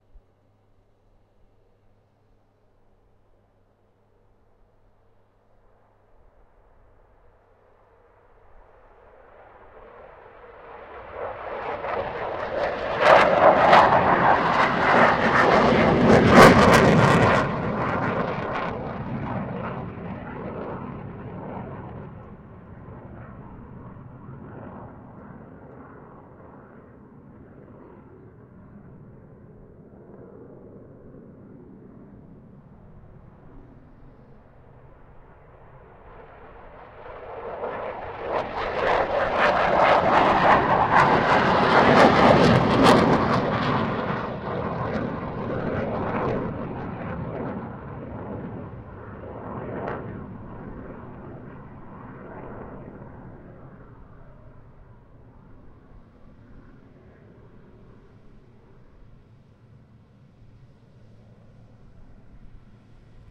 Field recording: two F16 fighter jets taking off from runway at Leeuwarden airbase Netherlands.
aeroplane, aircraft, F16, fighter, fighter-jet, flying, jet, military, plane, take-off, takeoff